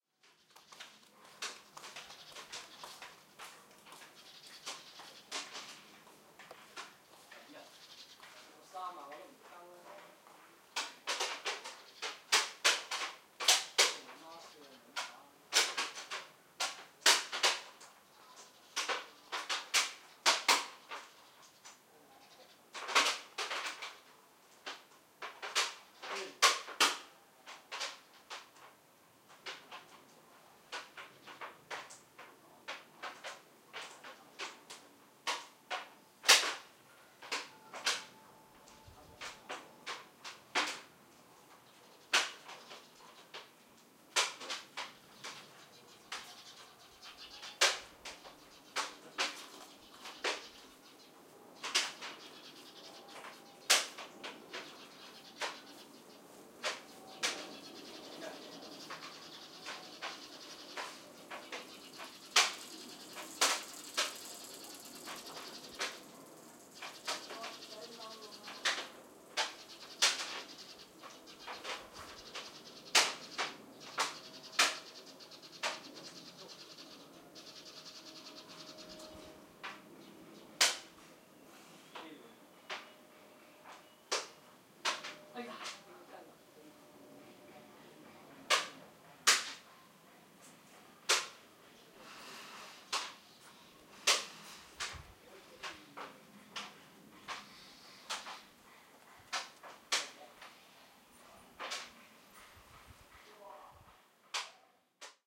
Stereo recording of people's daily life in Tai O, a small fishing village in Hong Kong. Hong Kong people love to play Majiang, especially the elderly. In this recording, they are playing Majiang outdoor which is not commonly found. That's why you can heard the birds singing. Recorded on iPod Touch 2nd generation with Alesis ProTrack.